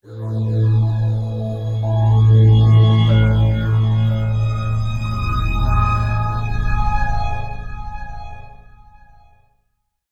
A synth texture.
pad, synth, texture